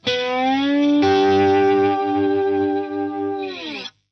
Slides-Slide G Slow-5
Slide guitar slow G 5 chord.
Recorded by Andy Drudy.
Seaford East Sussex - Home Studio.
Software - Sonar Platinum
Stereo using MOTU 828Mk 3 SM57 and SM68
Start into a Marshall TSL1000
Date 20th Nov - 2015
Slide, Blues